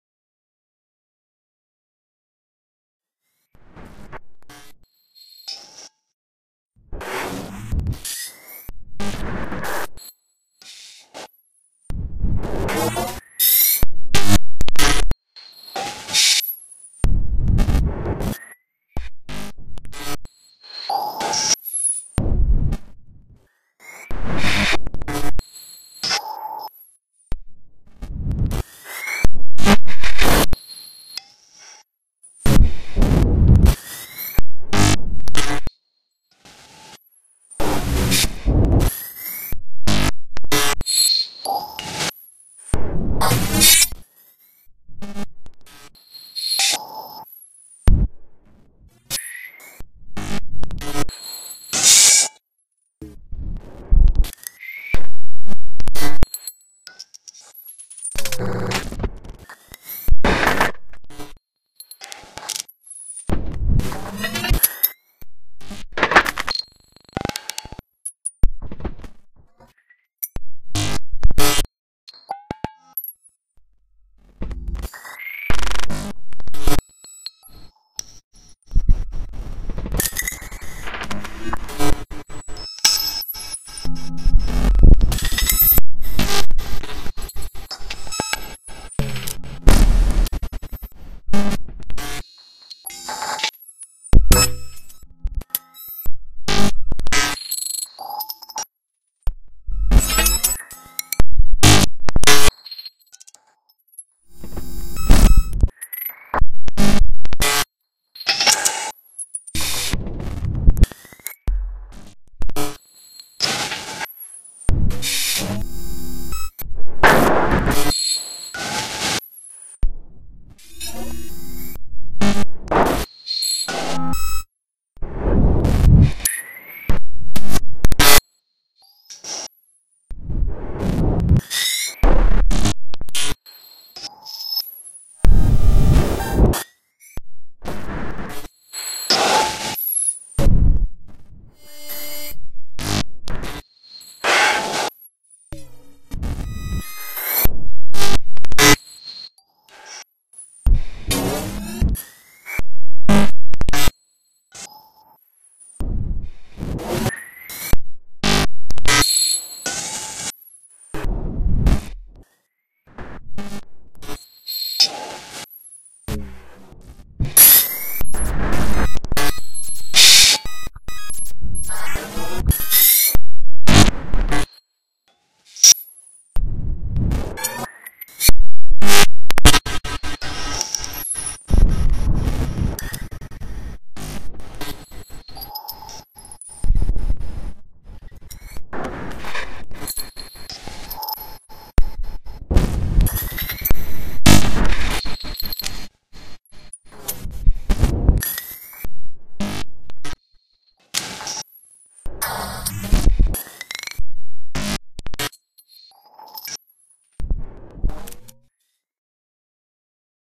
Recording of a vcv rack patch that heavily relies on the Audible Instruments Modal Synthesizer